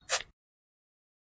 grass footstep soft 4
Footstep on grass recorded with Zoom Recorder